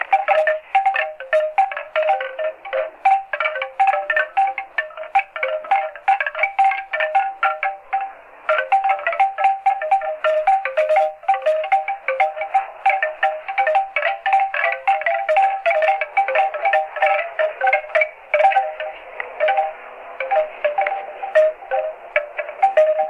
Lofi handheld memo recording of bamboo wind chimes.
bamboo, chime, lofi, noisy, percussion, wind